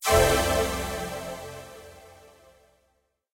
Swoosh FX Extra Short Loud
Synth swooshing sound of a square wave. Suitable for intros or logos. Available in several intensities.
intro, logo, swoosh